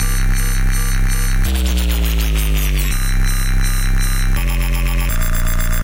dubstep wobble bass 165BPM
Some nasty wobble basses I've made myself. So thanks and enjoy!